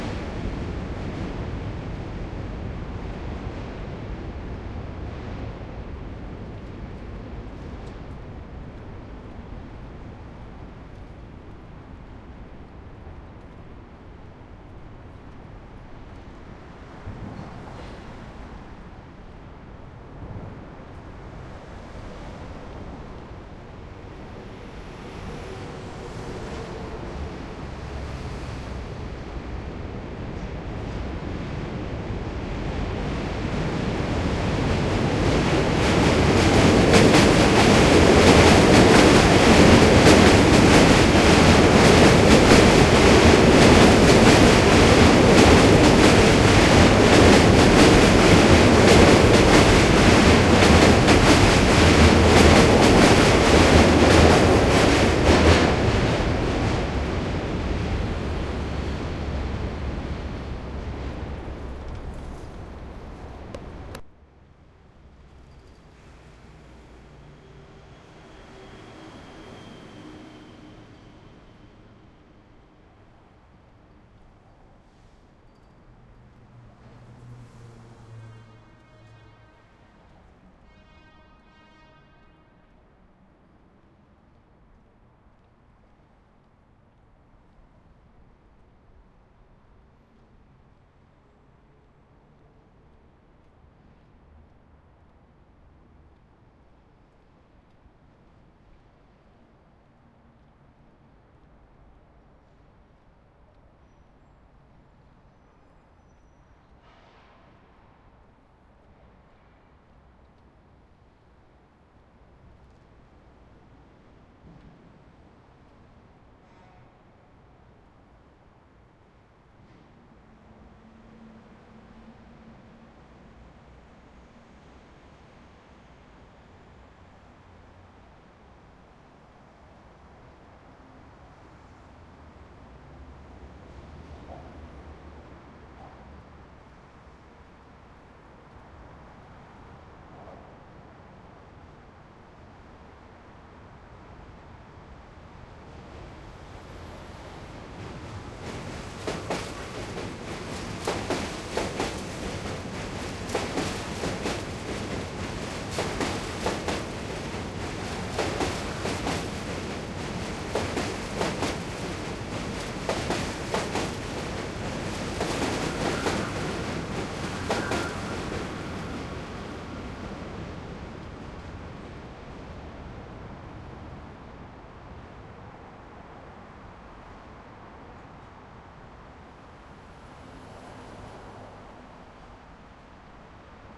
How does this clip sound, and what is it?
traffic light Brooklyn bridge subway train passby NYC, USA
bridge, Brooklyn, light, NYC, passby, subway, traffic, train, USA